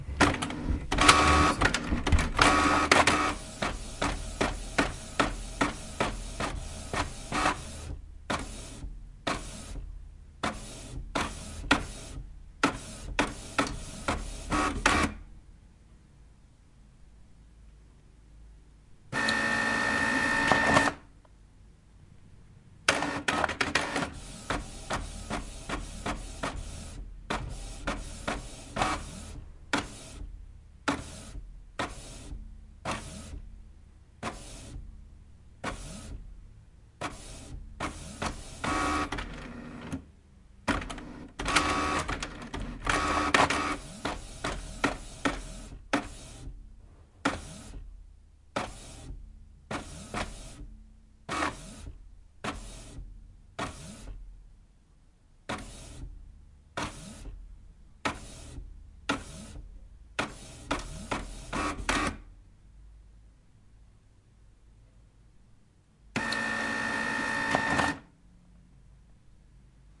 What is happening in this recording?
Recording of my printer doing it's thing. Made with a Zoom H4n
Printer, Machine, Appliance